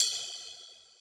Free drum sample processed with cool edit 96. Ride cymbal with reverb effects.
drum, percussion, reverb, sample, free, cymbal, ride, valdo